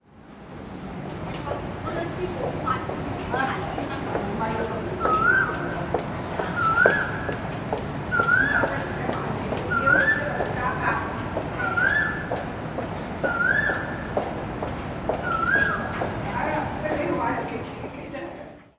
Soundscape in the streets of Macao listening to a typical bird